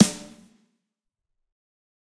Snare Of God Drier 006
drum, drumset, kit, pack, realistic, set, snare